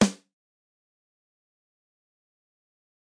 Trigger Snare 2
Drum trigger sample for drum enhancement in recordings or live use.
Recorded at a music store in Brazil, along with other kicks and snares, using Audio Technica AT2020 condenser, Alesis IO4 interface and edited by me using the DAW REAPER. The sample is highly processed, with comp and EQ, and have no resemblance with the original sound source. However, it adds a very cool punch and tone, perfect for music styles like rock and metal.
kick, trigger